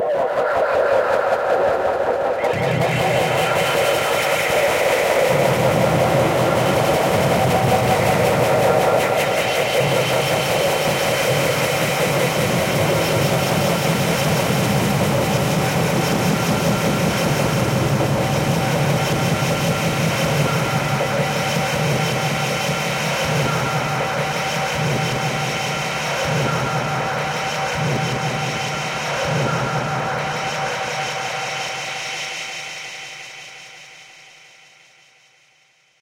A sound like a teleport-beam in startrek
Very futuristic
ufo, tractorbeam, scifi, beamme, outerspace, up